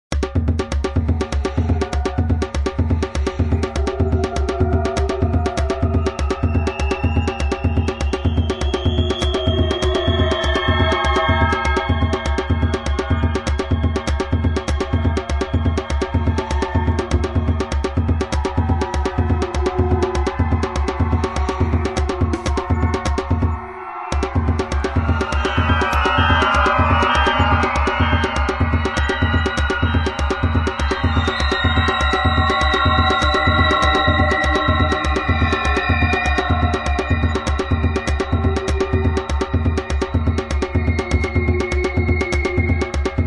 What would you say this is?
Dark Tribal Drum and Atmo
Free, Ambient, Africa, Movie, Horror, Drone, Beat, Drum, Dark, Thriller, Film, Atmosphere, Night, Cinematic, Tribal, Ambience, Amb